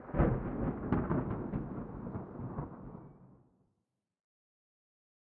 balfron thunder I
Field-recording Thunder London England.
21st floor of balfron tower easter 2011
England, London, Field-recording, Thunder